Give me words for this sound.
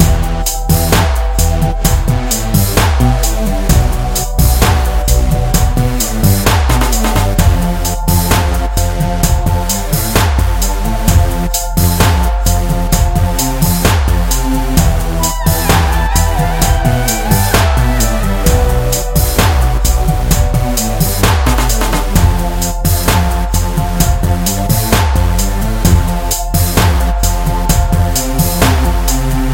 Loop NothingToFear 02
A music loop to be used in storydriven and reflective games with puzzle and philosophical elements.
gaming, Thoughtful, video-game, videogame, loop, gamedeveloping, music-loop